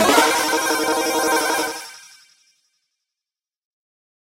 Retro Game Sounds SFX 171
sound-design, soundeffect, gameaudio, gameover, audio, freaky, shooting, electronic, weapon, effect, gamesound, gun, sounddesign, sfx